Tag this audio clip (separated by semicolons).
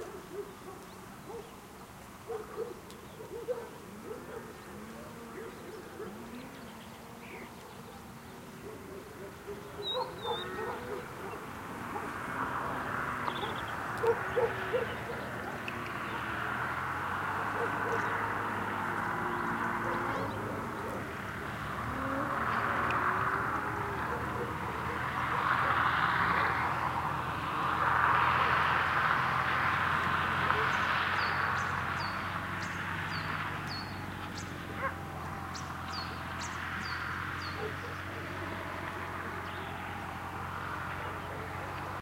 ambiance; cattle; nature; field-recording; autumn; birds